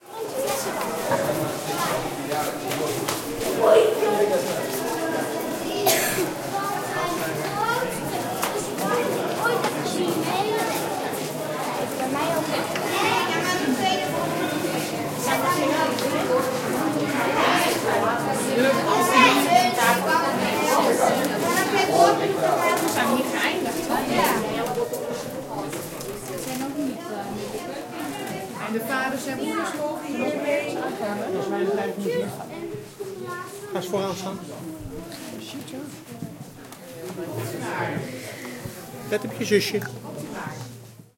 Dutch restaurant ambience (wide space)
atmosphere, voices, cafe, chatter, children, talk, chat, ambience, background-sound, soundscape, walla, ambiance, restaurant, ambient, chatting, adults, cups, people, crowd, talking, atmo, Dutch, general-noise, field-recording, conversation